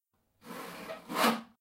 A one-shot of a saw going in and out of wood.
one-shot, saw